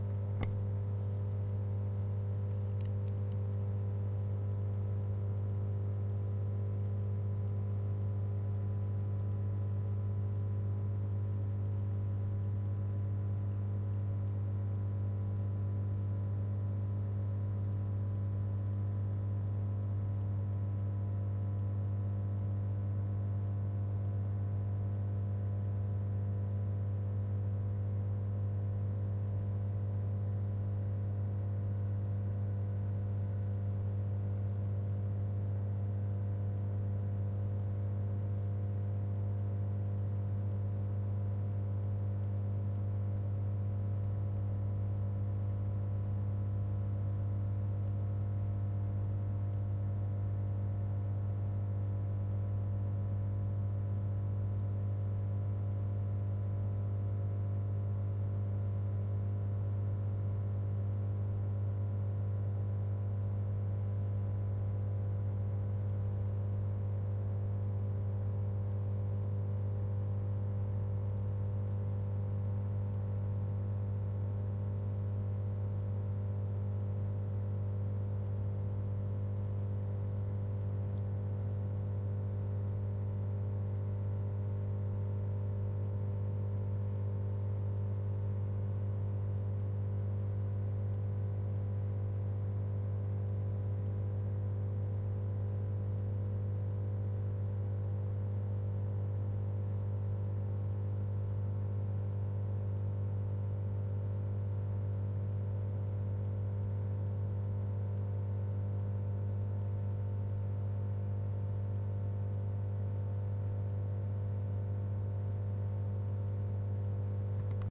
Sound of power station.